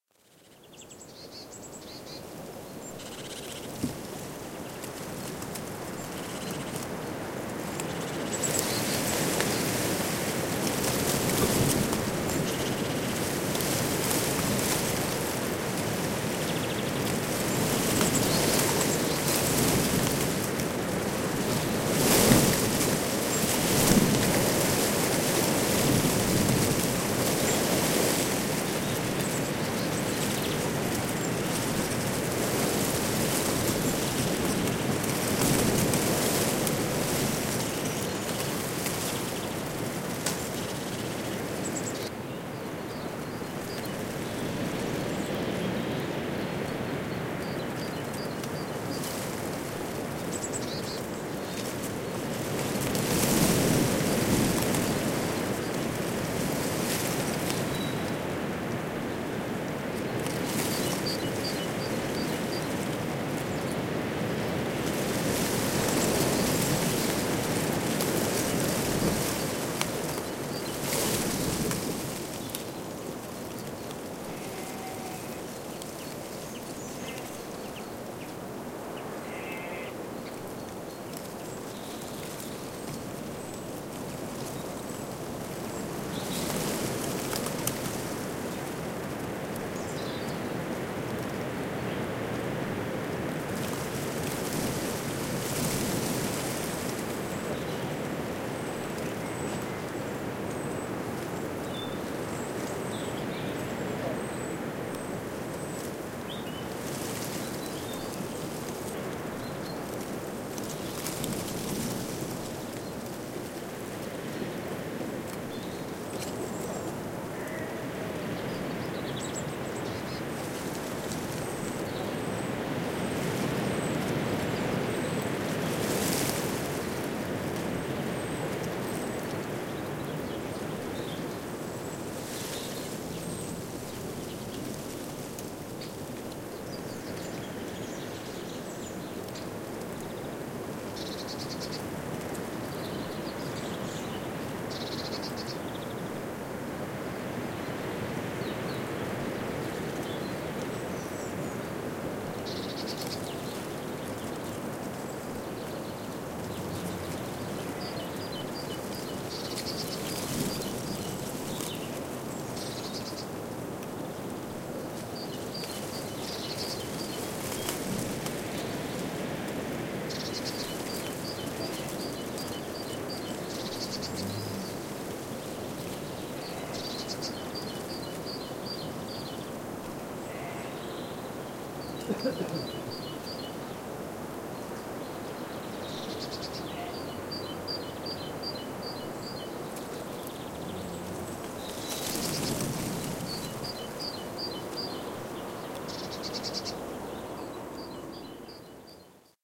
Wind Through Hedge
A stereo field-recording of wind blowing through an European Beech hedge( Fagus sylvatica ) where the dead winter leaves are still retained by the trees. Zoom H2 rear on-board mics.
xy, stereo, leaves, wind, rustling, field-recording, hedge, beech, fagus-sylvatica